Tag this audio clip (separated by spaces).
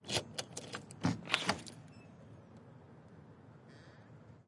door front-door key lock locking open opening unlock unlocking